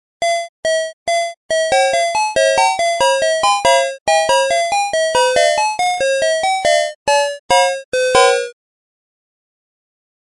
First song i made years back.
First song I made. Created with LMMS (I think). May need cutting.
P.S: This was originally made for my crazy soccer game.
crazy, funny, mad, silly, soccer